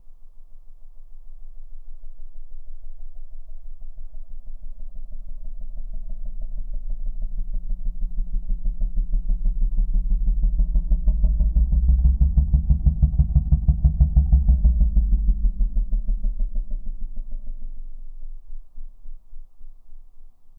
random17 - submarine
Own voice pitched down plus some added reverb.